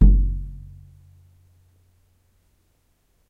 Nagra ARES BB+ & 2 Schoeps CMC 5U 2011.
bass drum hit on the hand
drum, bass, hand